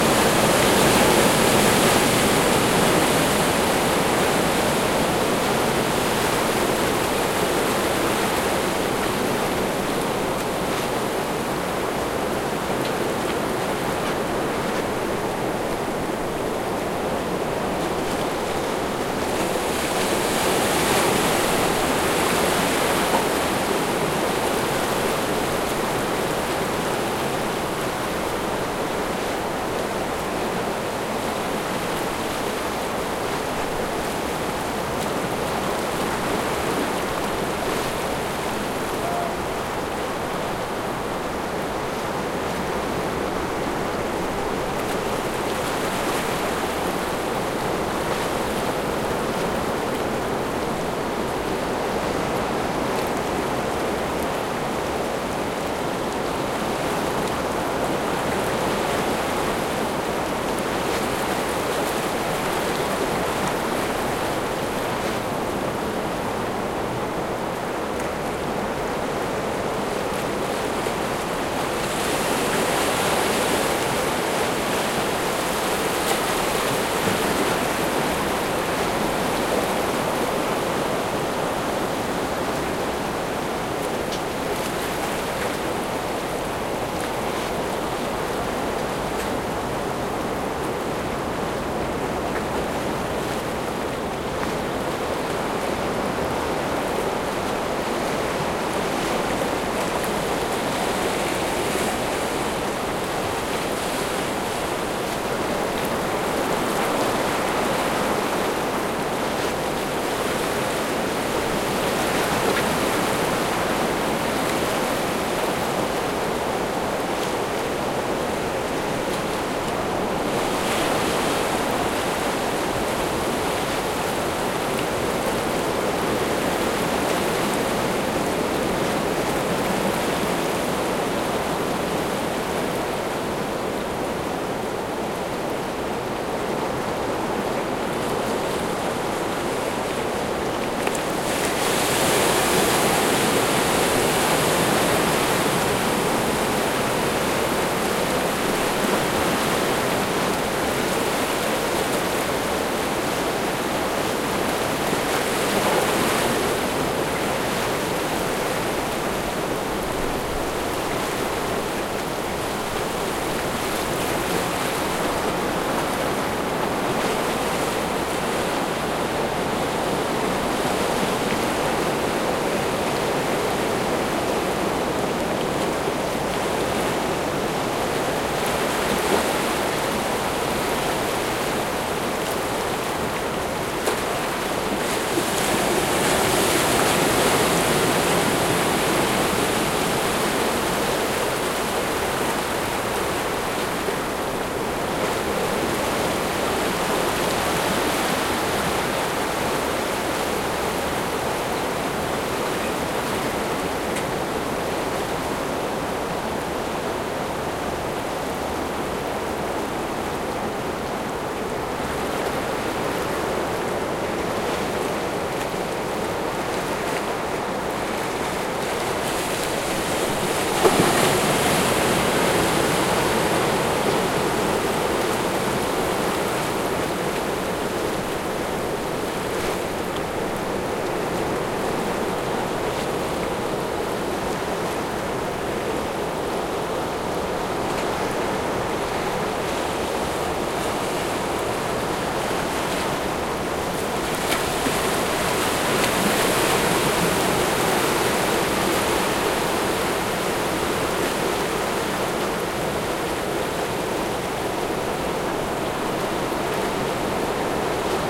Mar desde la escollera de costado +lowshelf
Mar con turbulencia mediana, tomado de costado.
Medium-swirl sea recorded from the side.
mar, sea, ocean